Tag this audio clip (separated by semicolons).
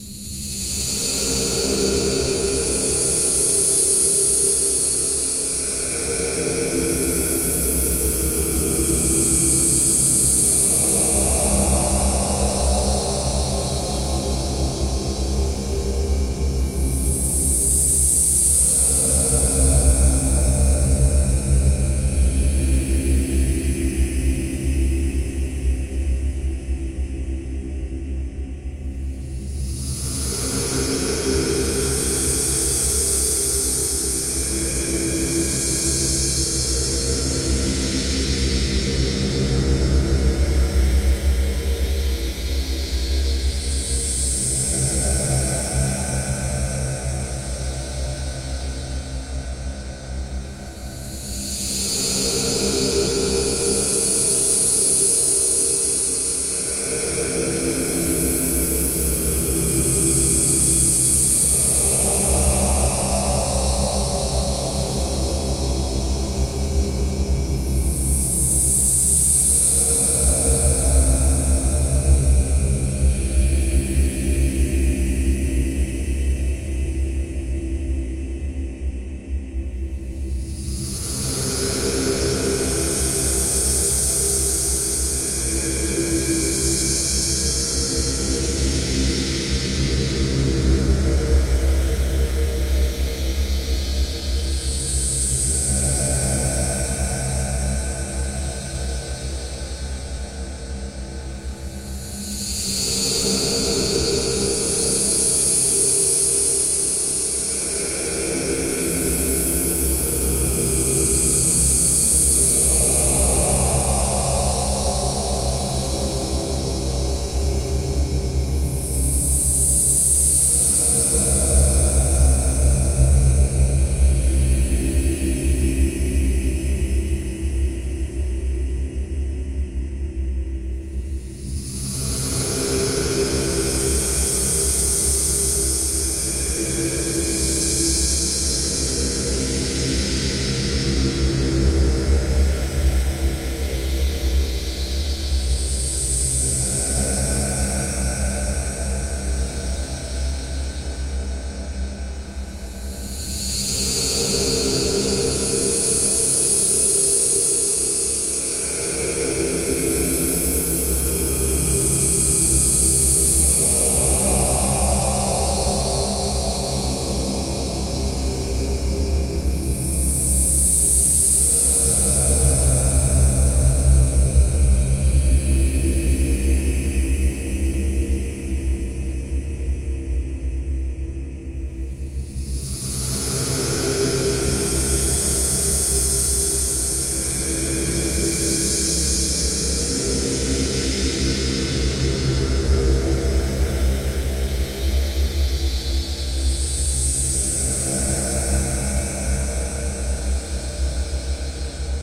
rite; scary; sinister; hell; blood; ghost; sacrifice; dark; bass; horror; ritual; creepy; sect; haunted; field-recording; spirit; terror